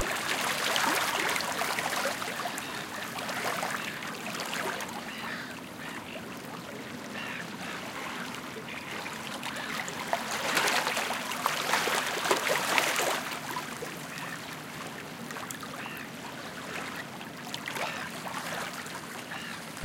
Lapping Waves and Sea Gulls 1
Close mic recording from the waters edge on a calm morning (7.30 am). This part of the shore is rocky. Seagulls can be heard in the background.
beach, field-recording, gentle, gulls, lapping, ocean, sea-birds, sea-gulls, shore, stereo, water, waves, wet